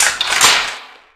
This is sound of Shotgun Reloading.
It is created using Baikal`s MP-654K, and edited in Audacity.
You can use this sound in any game where there is Shotgun. For example, mods for Doom 3.
doom, freedoom, gun, reload, shotgun, weapon